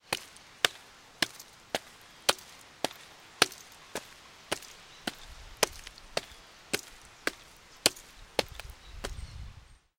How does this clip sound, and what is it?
Pasos sobre el asfanto en la lluvia